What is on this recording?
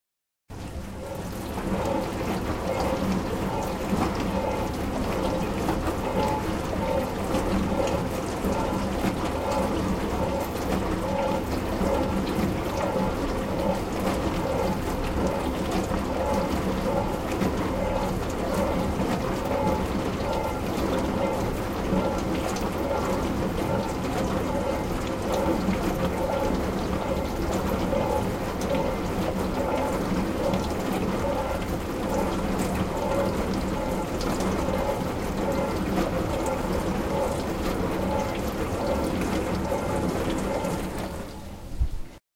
a dishwasher machine